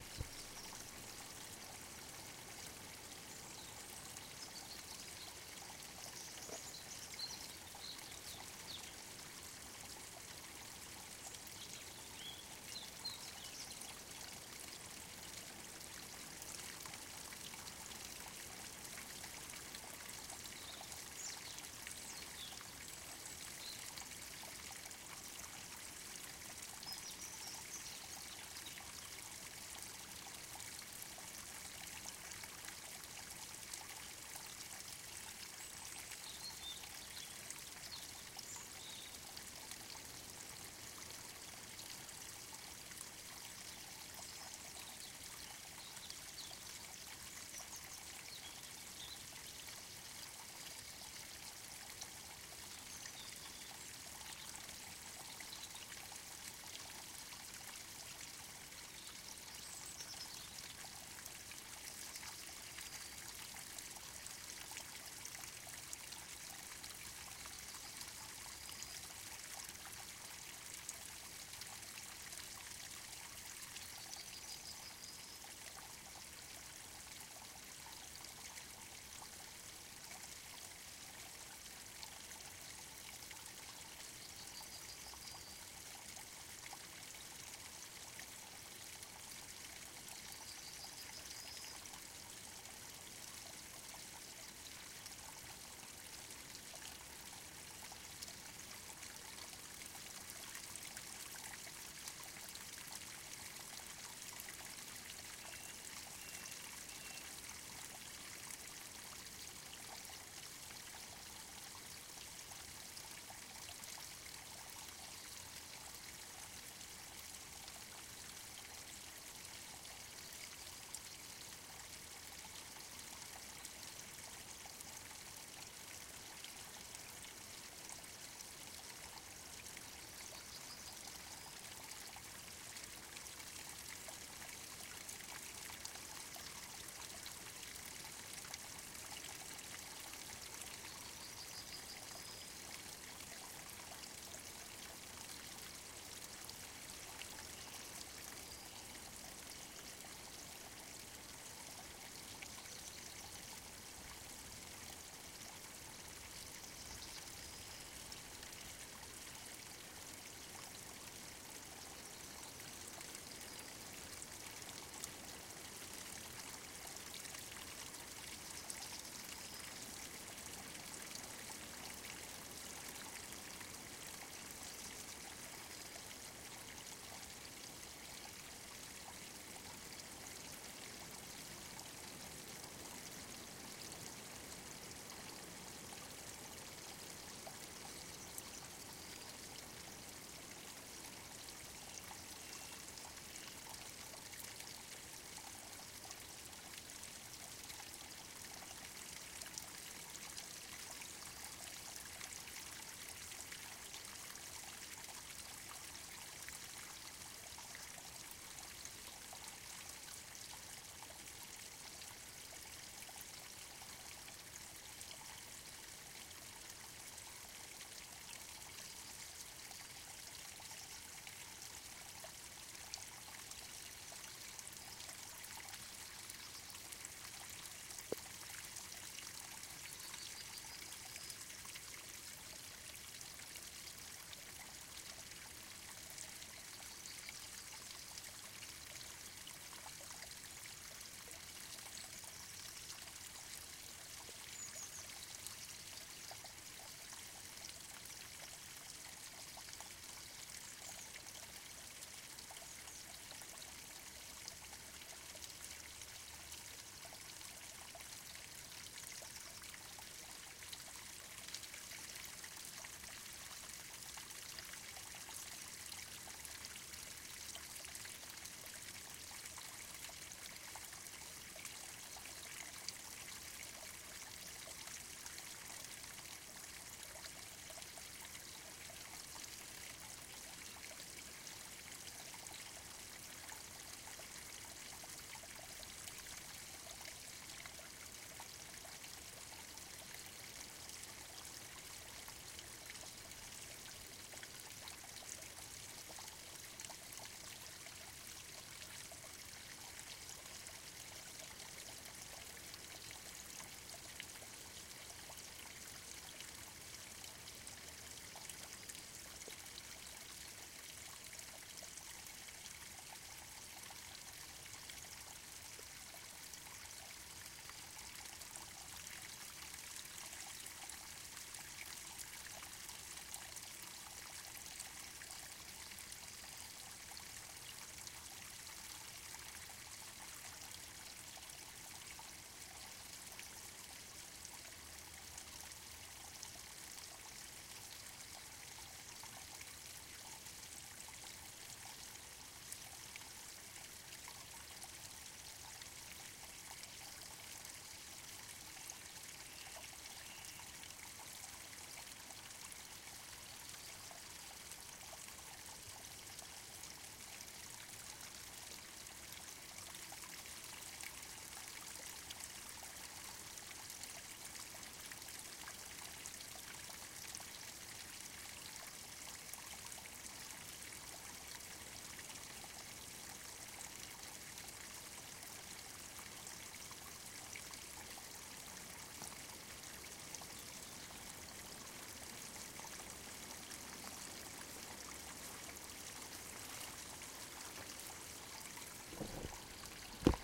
small-forest-stream-in-mountains-surround-sound-front
small forest stream in the mountains
forest, mountains, small, stream